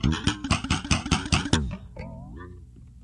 experimenting with a broken guitar string. a series of warbling clicks. clicking noise caused by the string hitting the metal peices of the pick-up on the guitar.
broken, click, clicks, clicky, experimental, guitar, note, notes, pluck, plucked, string